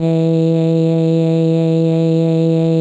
aiaiaiaiaiaie 52 E2 Bcl
vocal formants pitched under Simplesong a macintosh software and using the princess voice